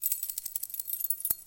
keys 5-sizzle
This is a recording of the sound of shaking keys.
UPF-CS12, bright, metal, ring, percussion, key, SonicEnsemble